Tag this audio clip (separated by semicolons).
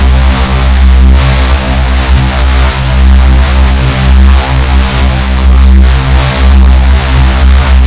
bass; driven; drum-n-bass; heavy; reece